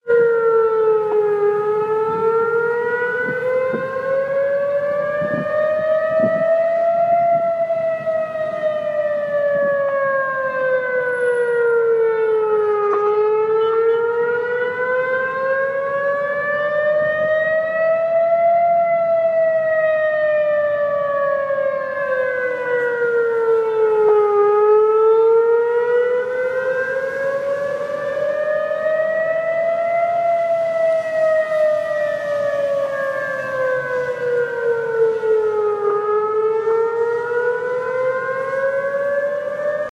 Emergency siren recorded in Montreal, Canada during a test on June 4th, 2015.
It was recorded on an iPhone 5s.
air-raid, alarm, alert, disaster, emergency, haunting, signal, siren, warning